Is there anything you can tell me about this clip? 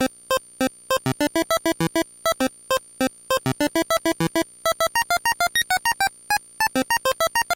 Provided 1 Pattern 2
chiptunes, drumloops, cheap, 8bit, glitch, videogame, gameboy, nanoloop